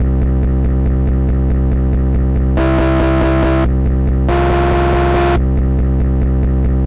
melodic dist synth
sounds, guitar, free, drums, filter, loops